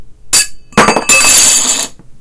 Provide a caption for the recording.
clack-clunk
Dropped and threw some 3.5" hard disk platters in various ways.
Short clack then impact and wobble
clack, impact, metallic